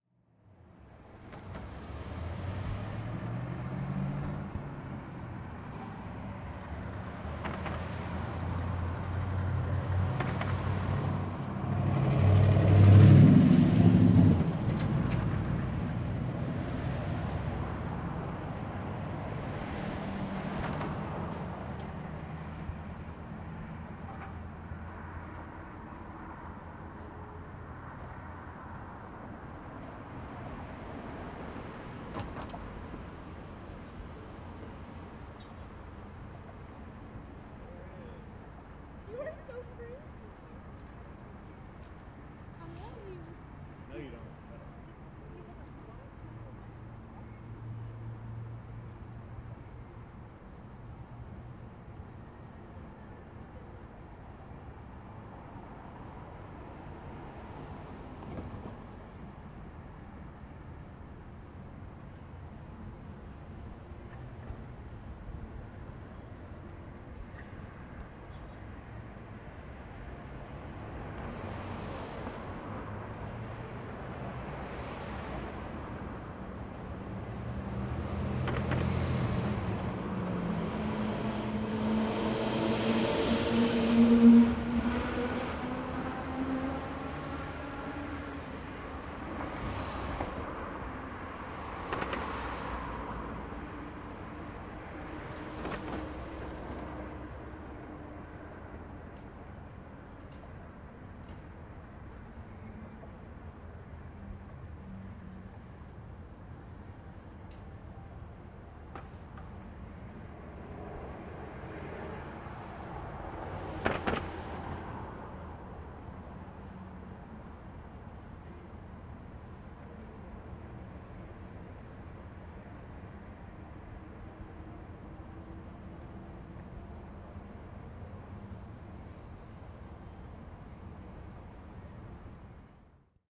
Traffic Intersection Rain 1
This is a recording of light traffic near an intersection in downtown Knoxville, TN, USA on a rainy evening.
ambiance, ambience, ambient, asphalt, atmo, atmosphere, car, cars, city, field-recording, general-noise, noise, people, rain, rainy, road, soundscape, street, suv, town, traffic, truck, van, wet